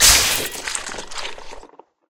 Gut Rip (Bladed)
Gore sound effect for when something really needs to die via metallic means of bisection. The ingredients for such a grotesque sound is:
Cardboard/newspaper, ripped with a degree of strength near the microphone (XY microphones on Zoom Handy's are very good for isolated, center sounds capturing all the necessary sharp transients. Careful not to smack yourself or the microphone like I did.
Eggs, preferably out-of-date by some days - gives it some "density". Use a pirex dish or your showerroom when doing this as it can get messy. Do not crack the egg for the yolk, crush the entire thing! The hollowness of the shell creates the whip and crack of something being broken into and the yolk's sloshiness makes for juicy, sloppy sounds.
Knife sharpening against other knifes for a nice "schwing". Pitching the sound can give it a very naunced effect of something very sharp but it also can make it sound fairly comical as well.
blood, bones, break, flesh, gore, horror, horror-effects, horror-fx, limbs, scwhing, slash, squelch, torso